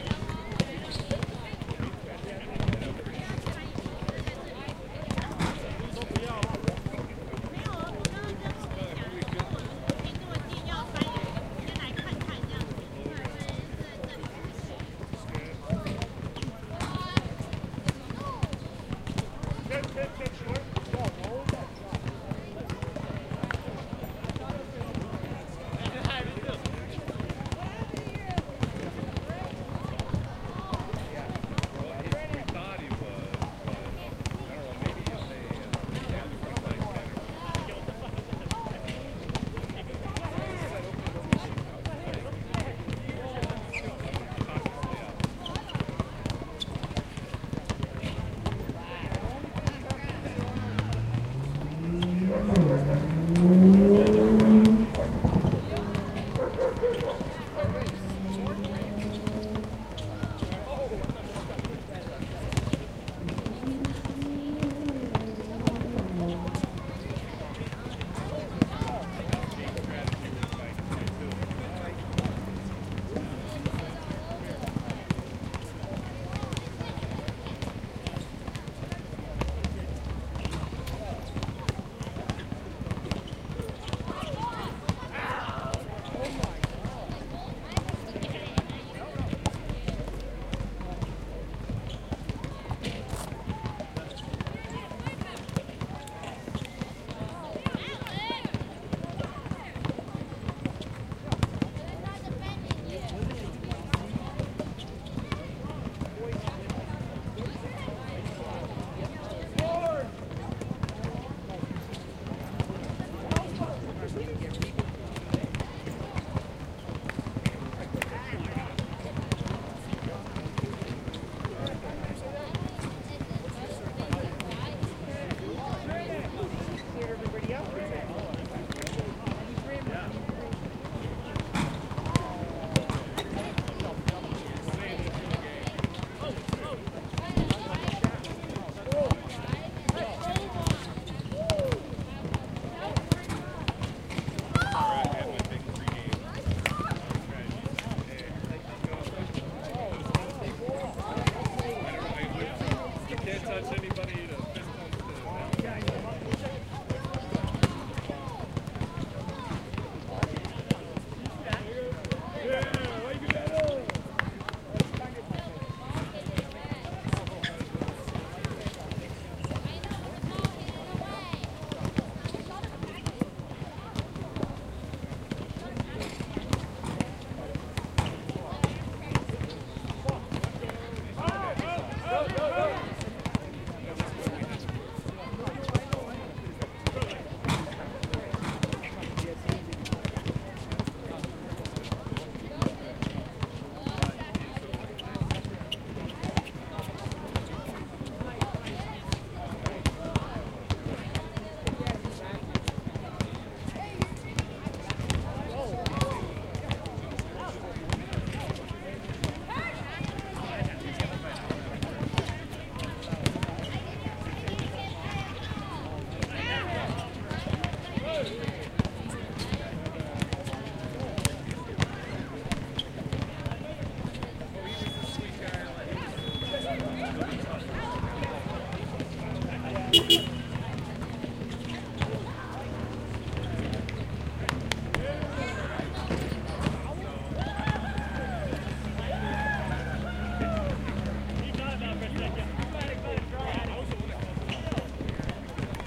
basketball crowd
busy basketball court